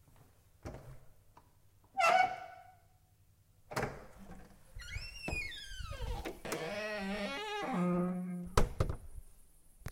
Door Creak
Recorded this while going to the loo in a camping trip. The door was pretty creaky so I decided to record it because it could be a good sound effect. Of course I didn't have any equipment with me so the sound was recorded on my phone, which is why it's a bit echoey and shabby.